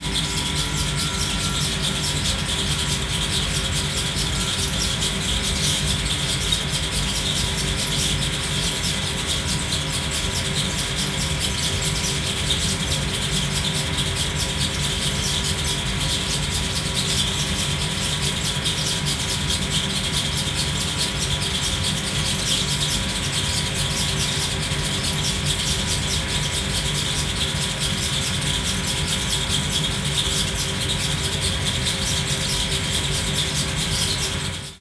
Fujitsu air-conditioner compressor recorded with Zoom H4n. The compressor is at least 10 years old thus making lots of squeaking noise from the fan.